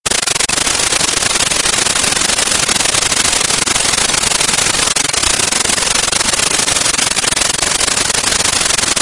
A synthesized sputtering sound produced by modulating white noise with envelopes and sending it through a delay.
audio-art, itp-2007, maxmsp, noise, sputter